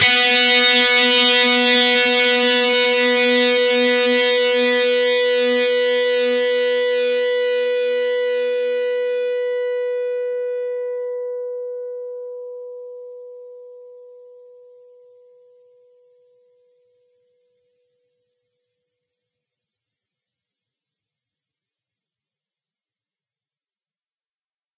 Dist Chr Brock 2strs 12th
Fretted 12th fret on the B (2nd) string and the 14th fret on the E (1st) string. Down strum.
chords, lead-guitar, distortion, lead, guitar-chords